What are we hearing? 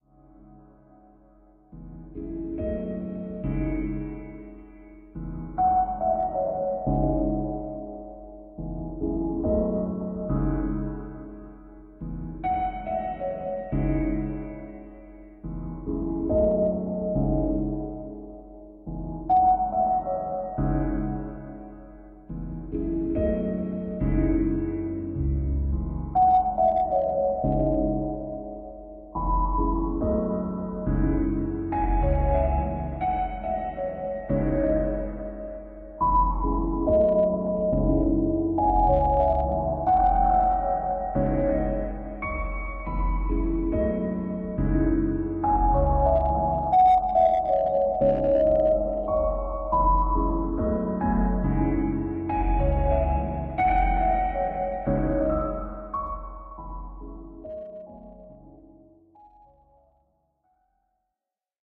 140bpm or 70bpm will work.
Other Remixes of this loop -
Ambiance, Ambient, Atmosphere, Music, Piano, Soundtrack